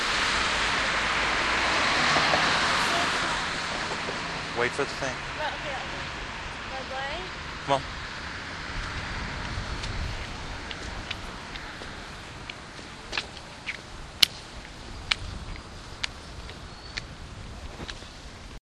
Crossing the street towards the Washington Monument from The Ellipse recorded with DS-40 and edited in Wavosaur.